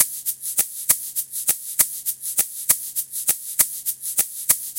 100bpm loop egg shaker percussion
egg, percussion, 100bpm